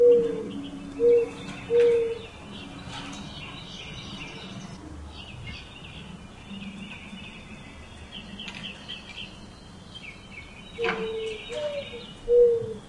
Morning doves and song birds recorded in the spring at St. Augustine, Florida.